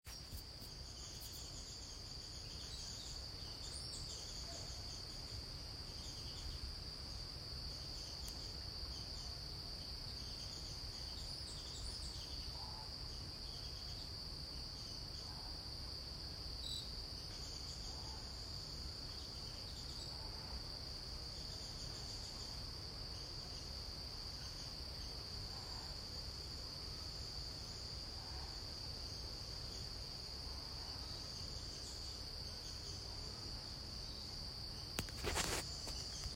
Rainforest - Ferns Grotto (Kauai, Hawaii)
Recorded: February 2022
Location: Ferns Grotto, Kauai, Hawaii
Content: Sounds of Hawaiian rainforest
ambiance, bird, birds, birdsong, ferns-grotto, field-recording, forest, grotto, hawaii, hawaiian, kauai, nature, rainforest, tropical